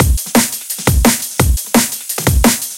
That rolling and high quality DRUMS You can hear in top of neurofunk tunes.
Powerfull and unstoppable DRUM LOOP.
And this is absolutely FREE.
Also we use filtered oldschool (Amen Break) for groove.
All sounds was mixed in Ableton Live 9.
24.12.2014 - date of creating.